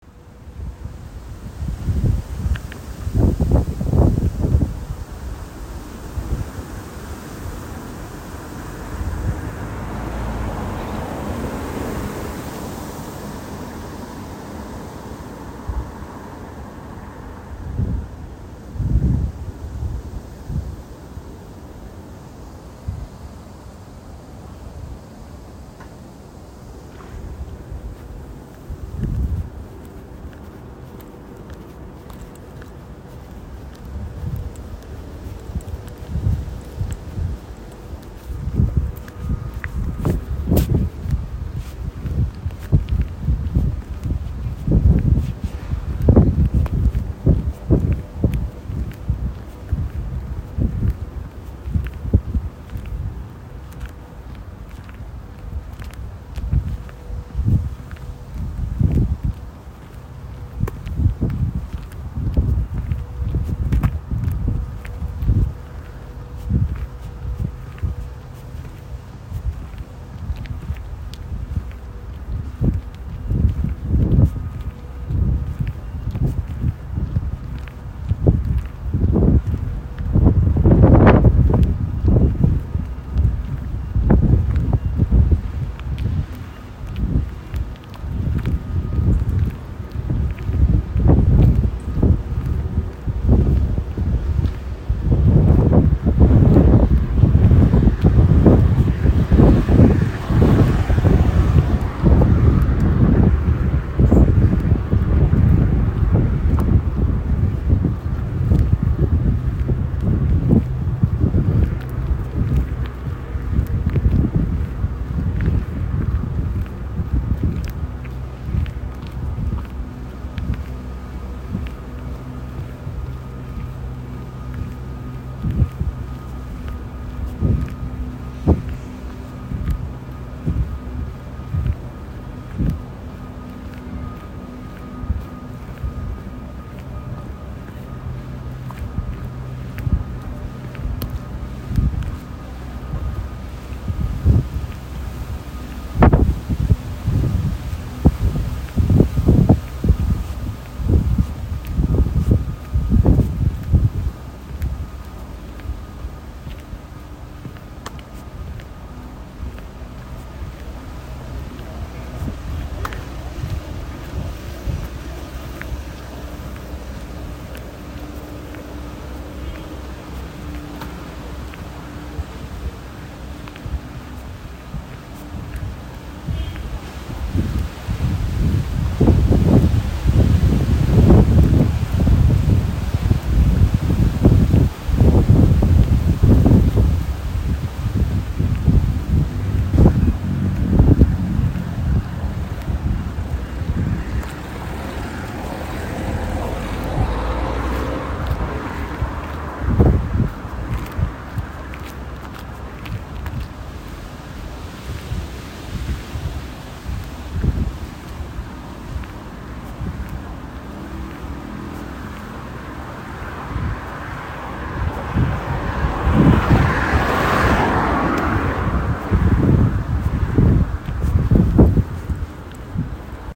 A stroll through an industrial estate
A walk on a windy day through an industrial estate
Road, beeps, estate, industrial, general-noise, Cars